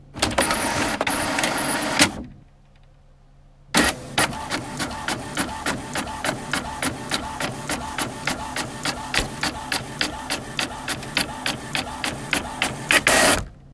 printer in action